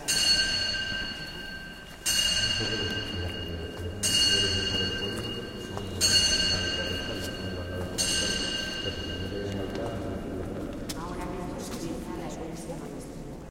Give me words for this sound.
small bell strikes inside reverberant hall, voices in background. Recorded in Yuso Monastery (San Millan de la Cogolla, Spain) using PCM M10 with internal mics
bell, church, field-recording, monastery, rioja